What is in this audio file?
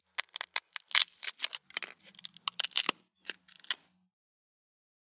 SFX wood crack destroy burst 01
SFX, wood, crack, destroy, burst, break, destroy